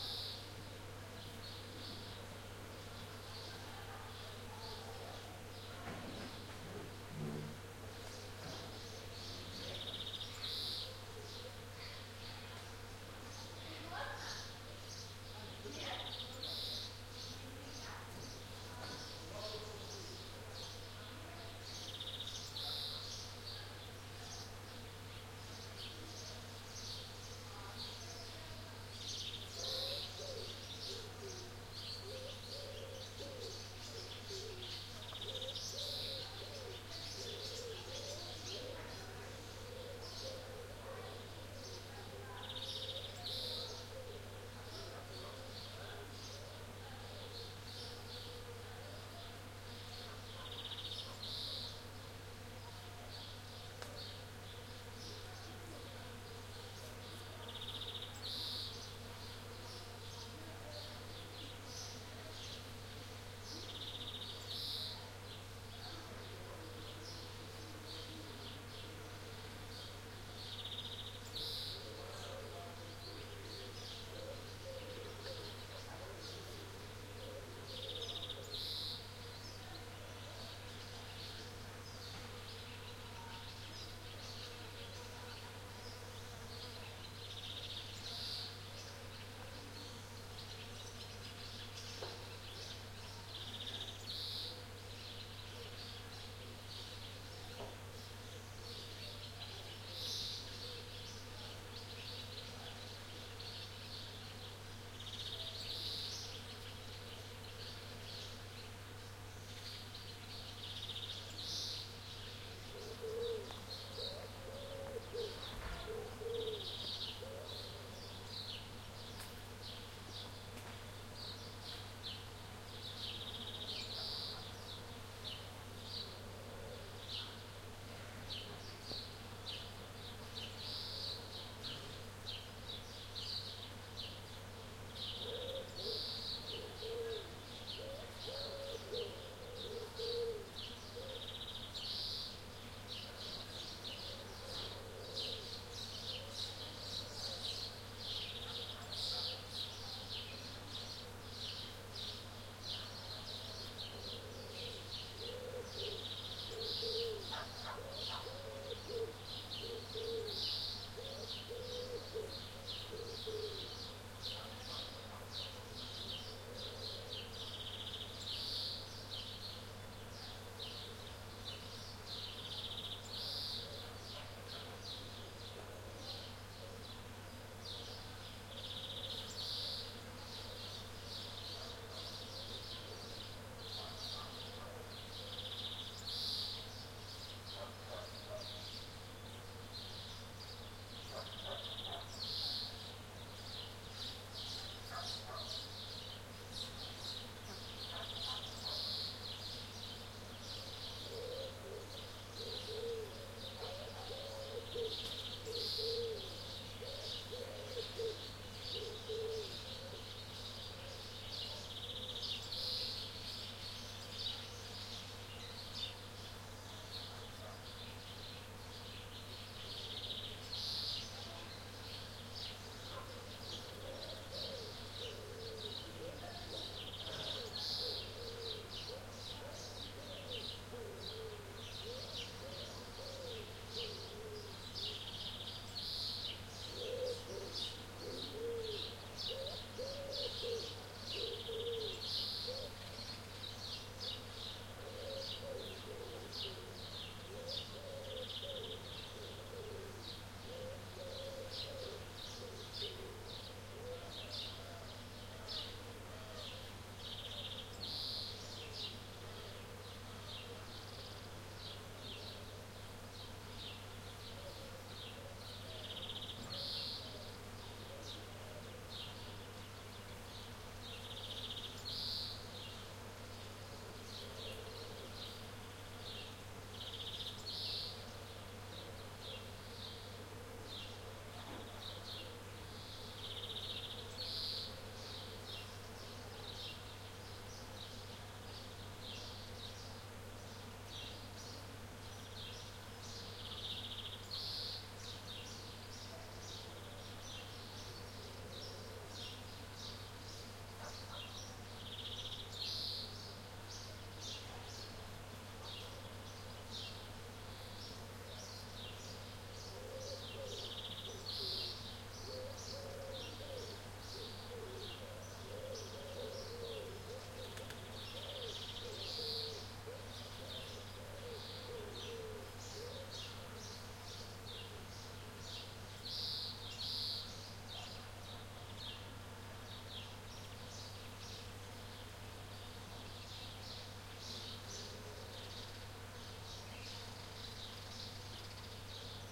Countryside ambience Cyprus Miliou parking lot Ayii Anargyri spa resort XY mics
Recording made at the parking lot of beautiful spa resort in the mountains of Cyprus, Miliou area.
Bees, birds, people in a distance.
Recorded with roland R-26 built-in XY mics.
insects, bee, Cyprus, field-recording, countryside, Miliou, distant-people, nature, parking-lot, birds